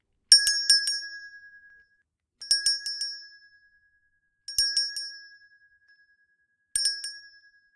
A bell from the opening of s shop door, recorded a foley